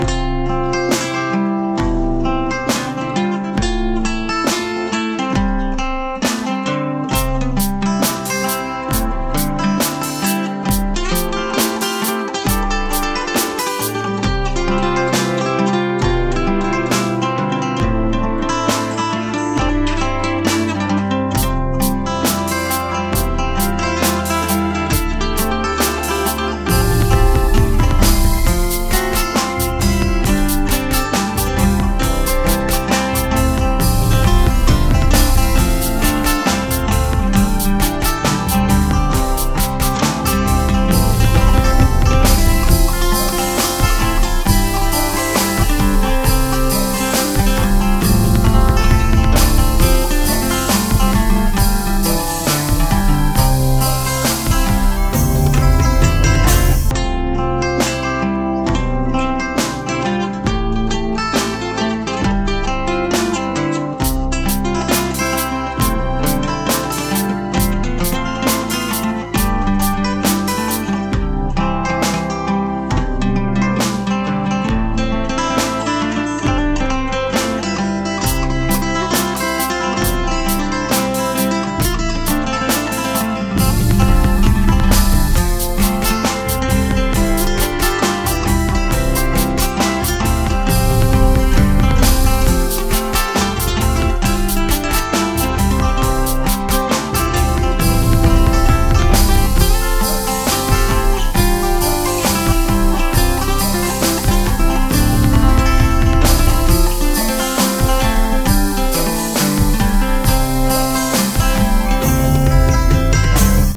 Happy Mellow 1 Loop
All the music on these tracks was written by me. All instruments were played by me as well. All you have to to is loop them and you'll have a great base rhythm for your projects or to just jam with. That's why I create these types of loops; they help me create full finished compositions. If you would like to check out my original music it is available here:
The-Road-to-Oblivion-2
Audio, Beats, Blues, Classical, Clips, Country, Dub, Dubstep, EDM, Electro, Guitar, House, Jam, Keyboards, Music, Original, Rap, Rock, Synth, Techno, Traxis